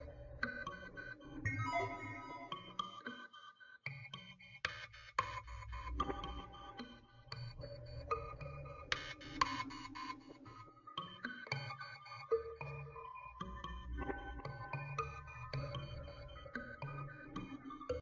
kalimba home made with some delay